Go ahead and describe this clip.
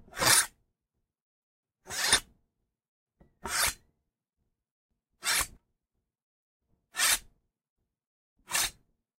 Weapon SwordDraw
A sword draw sound I made by scraping a machete on a sledge hammer. Recorded with my Walkman Mp3 Player/Recorder. Simulated stereo, digitally enhanced.